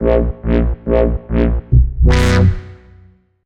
This synth loop was designed to work together with the others in this pack at 140 bpm. 1 beat loop and 2 synth loops. Give them a try if you are experimenting with sampling, or use them in a track.
140, bass-wobble, future-garage, 2-step, 320-kbs, dubstep